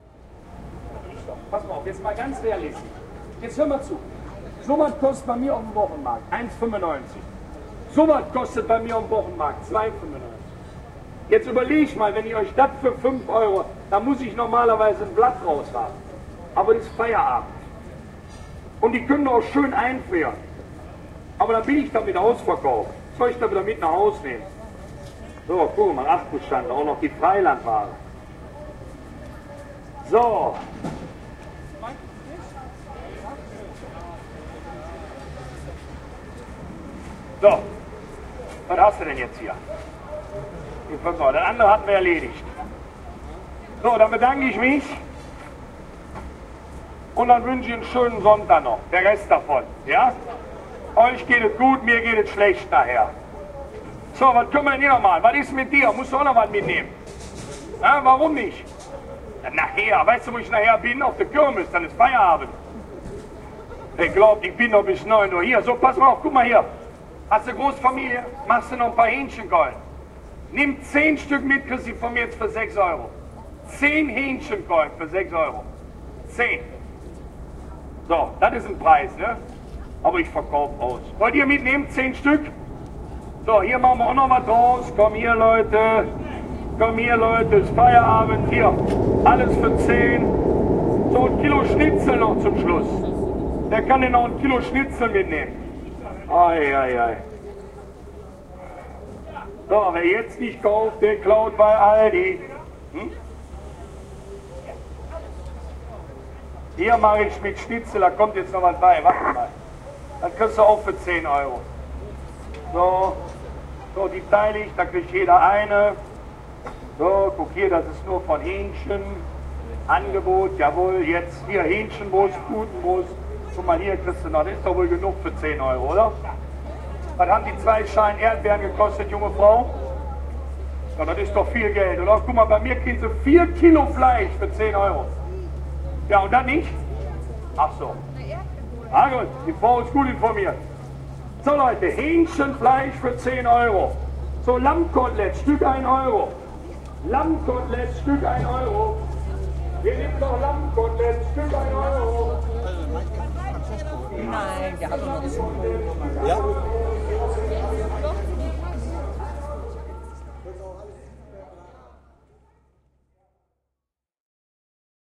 10-06-06 Duesseldorf Tonhallenufer Fischmarkt Marktschreier
A pitchman trying to sell meat to visitors at a local fish market in Düsseldorf, Germany.
Recording Equipment: Fame HR-2
market, urban, voice, field-recording, city